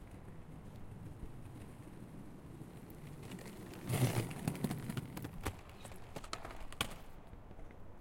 The sound of skate boards that i take for my video project "Scate Girls".
And I never use it. So may be it was made for you guys ))
Here Girls ride from hill one by one.
hard, skateboard, skate, riding, wooden, skating, creak, Single, long, skateboarding, close, wheels, board